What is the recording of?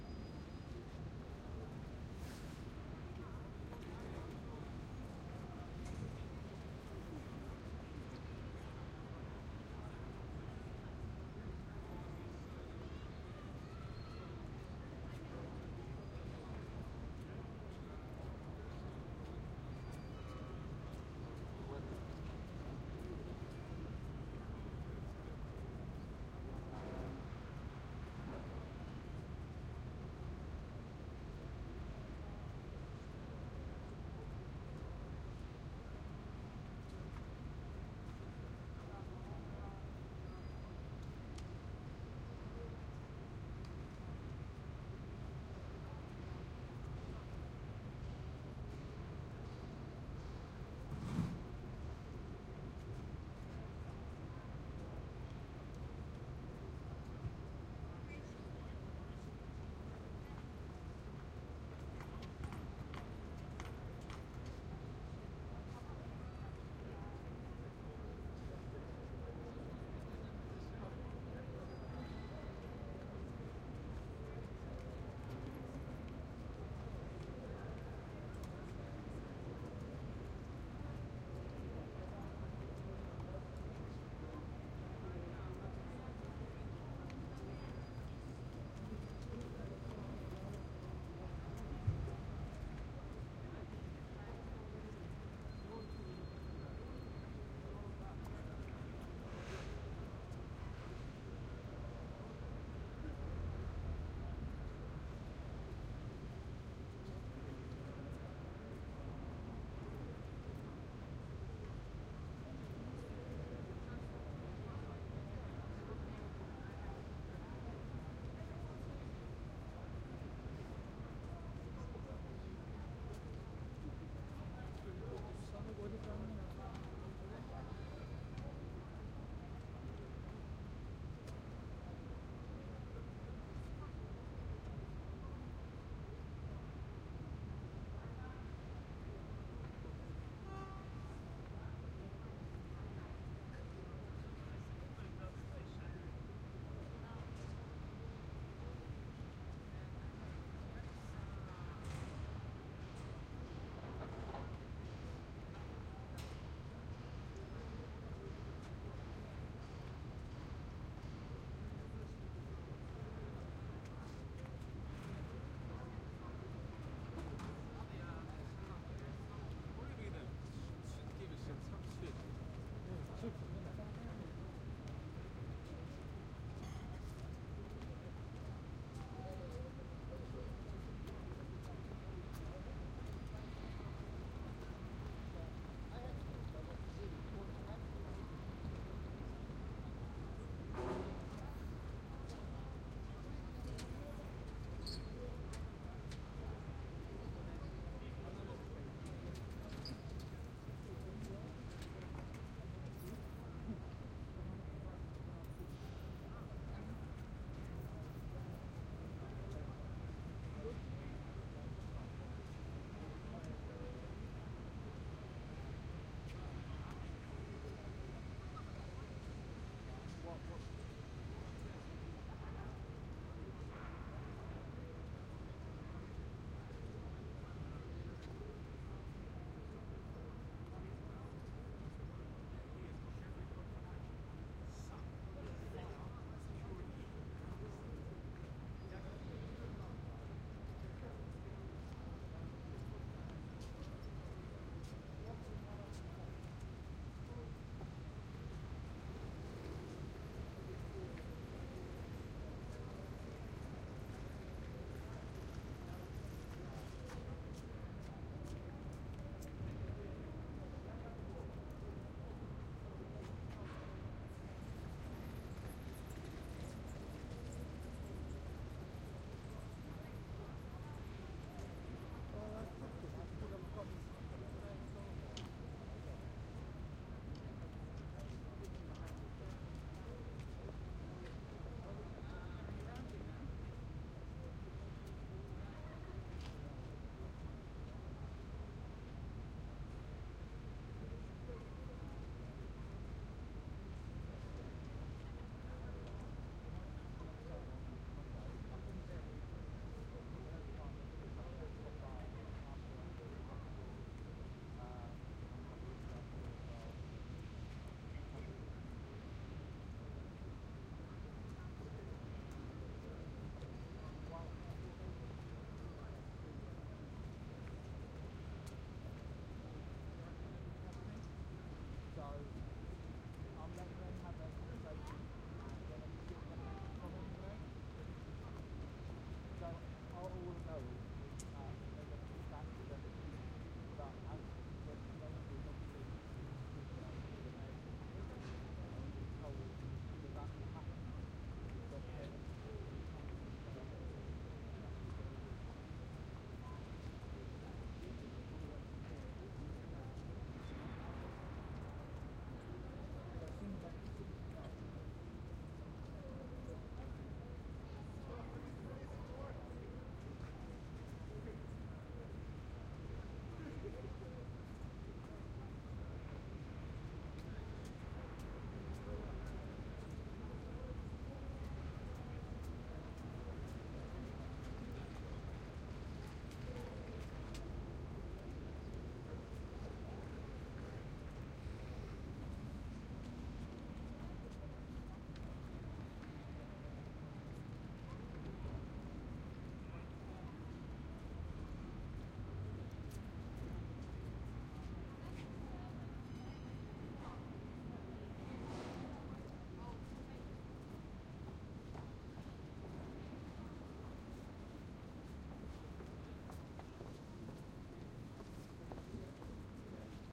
Ambience - Train Station - Outside
Outside London Liverpool Street Station - West Exit - 4pm
ambience, cityscape, traffic, a, urban, people, background, ambient, outdoor, street, station, field-recording, cars